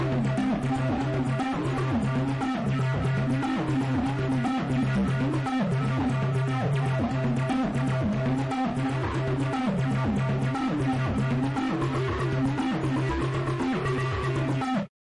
Distorted Synth Sequence
A synth line that I made some while ago. Processed it with distortion and other effects
synth, experimental, electro, space, distorted, sound-synthesis, line, electronic, bass, sound, synthesizer, distortion, loop, digital